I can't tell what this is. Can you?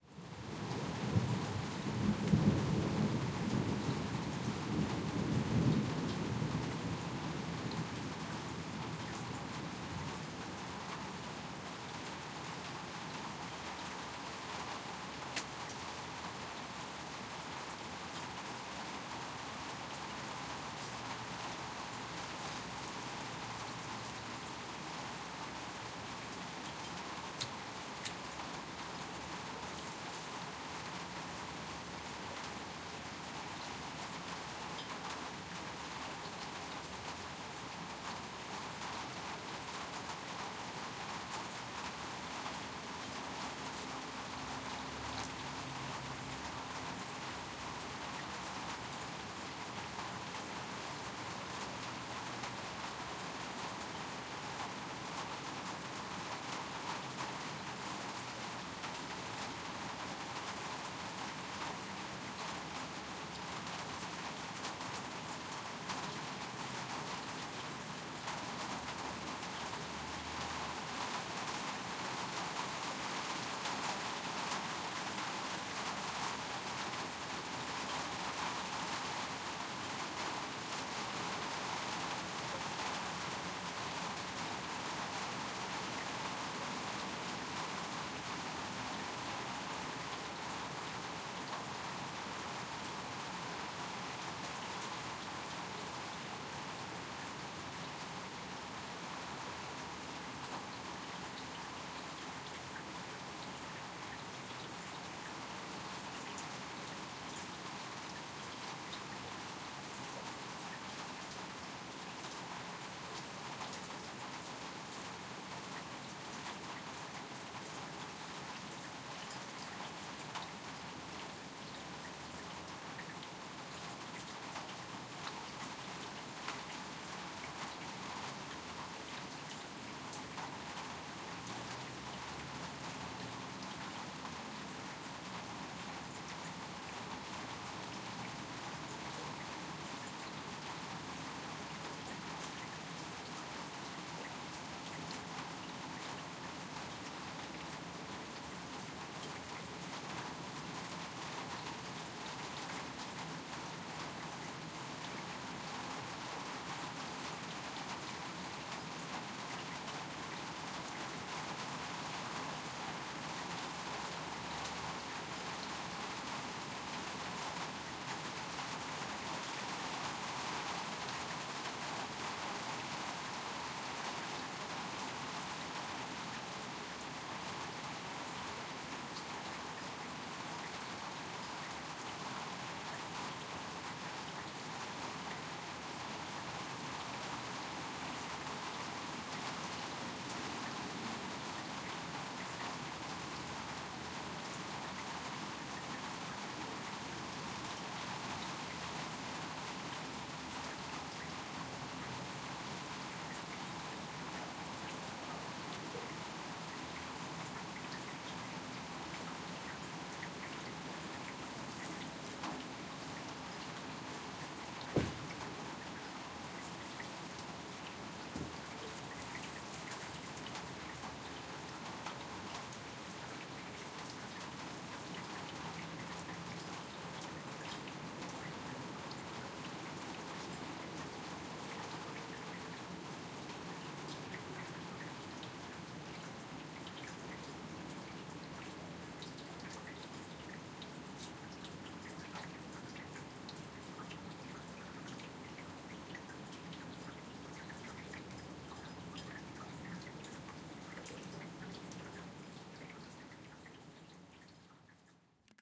Rain hitting the roof and running down the gutter, some thundering, random sounds of a lighter and paper wrapper.